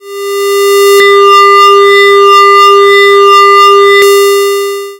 HAMOUDA Sirine 2014 2015 Hooter
In first, go to Generate menu and select Sound :
→ Wavefrom : Square
→ Frequency (Hz) : 400
→ Amplitude (0 to 1) : 0.8
→ Duration : 5 sec
Then in the same menu, select in the track 1sec from the beginning and go to Effect menu and choose Fade In.
Repeat the same operation for the last second in the track but with Fade Out effect.
Always in Effect menu and choose « Wahwah » :
→ LFO Frequency (Hz): 1
→ LFO Start Phase (deg) : 0
→ Depth (%) : 18
→ Resonance : 7.2
→ Wah Frequency Offset (%) : 50
Typologie (Cf. Pierre Schaeffer) :
X (Continu complexe) + V ( continu Varié)
Morphologie (Cf. Pierre Schaeffer) :
1- Masse: - Son "cannelé"
2- Timbre harmonique: moyennement brillant
3- Grain: le son est 'lisse"
4- Allure: une partie du son seulement comporte un vibrato
5- Dynamique : attaque continue
6- Profil mélodique: variations serpentines couplées avec un scalaire "doux"
7- Profil de masse : 1 strat de son glissante avec une légère séparation